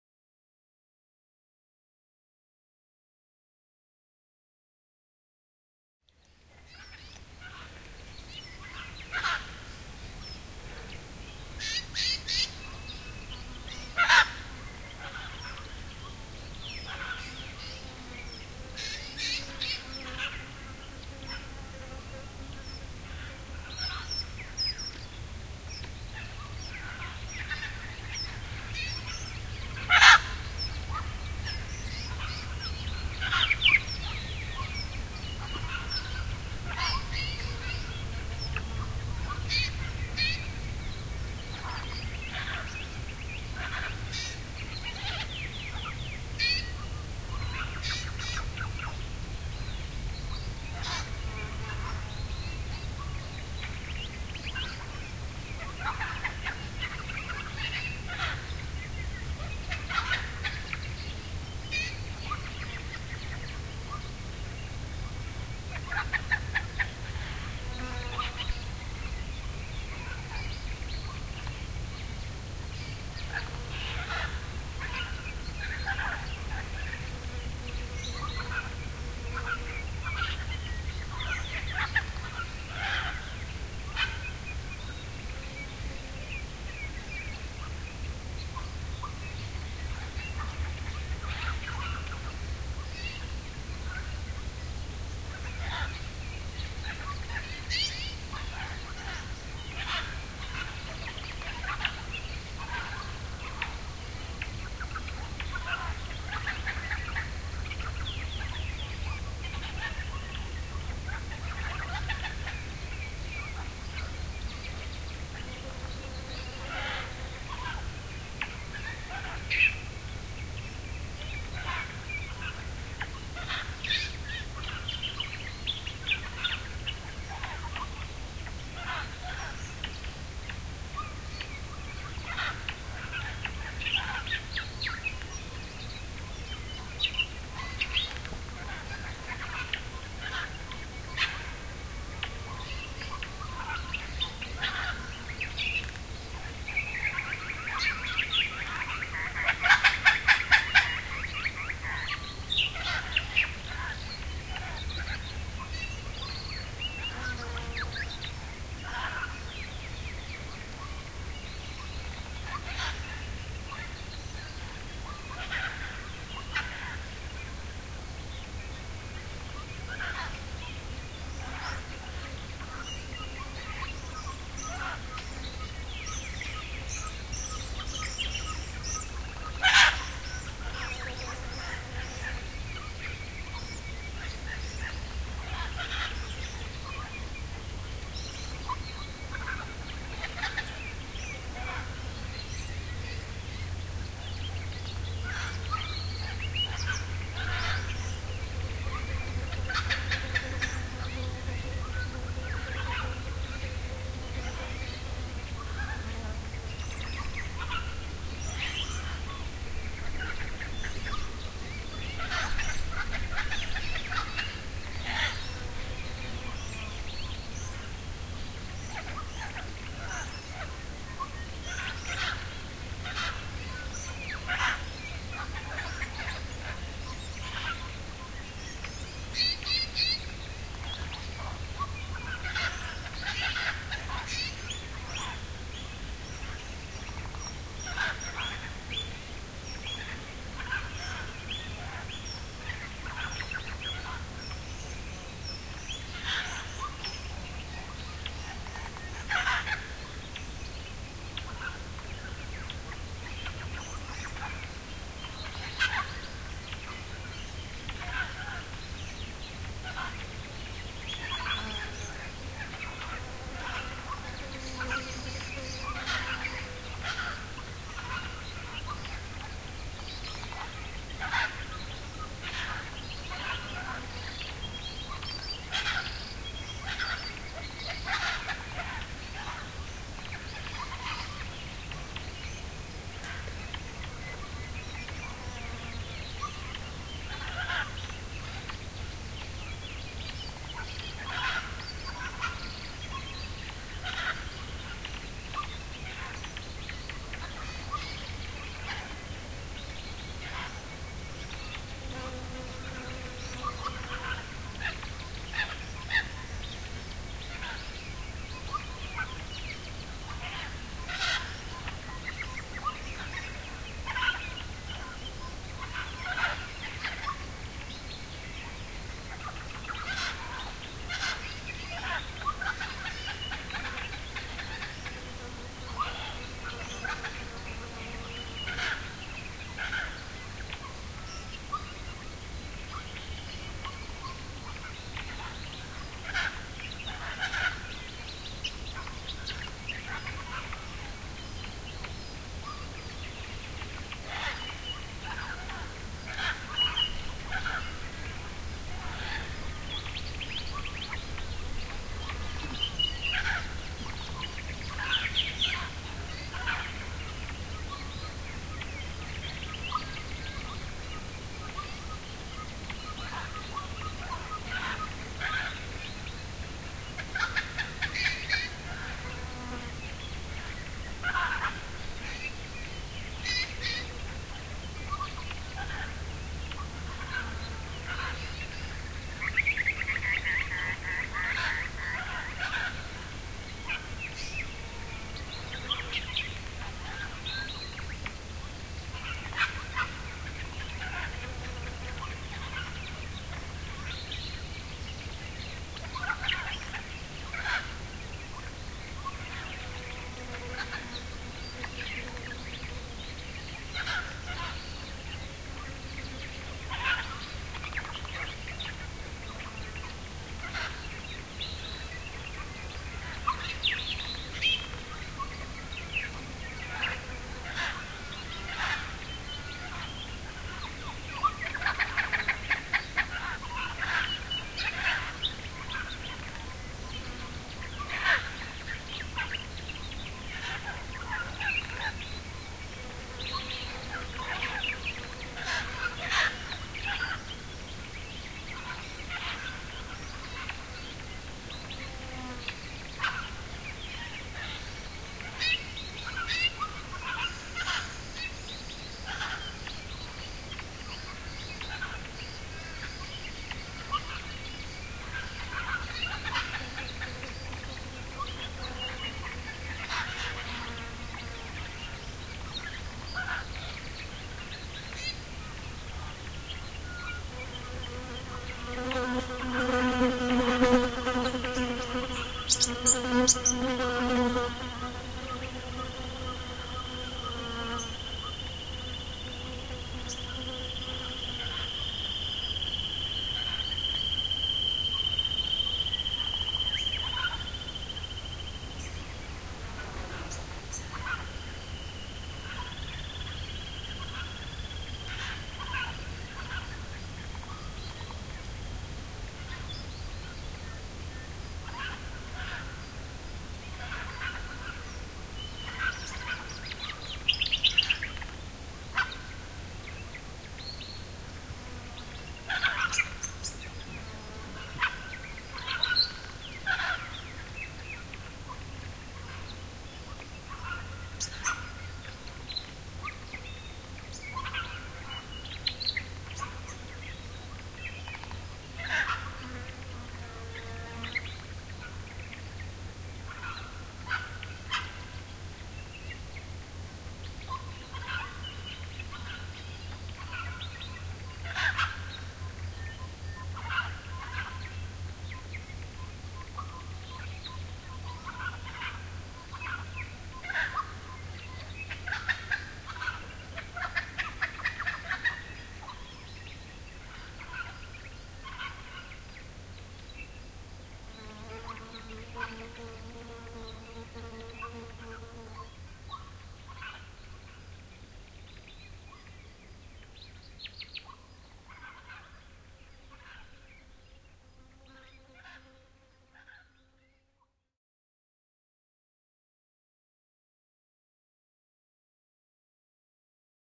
Recorded January 2013 at a beutiful pink salt lake in Western Australia.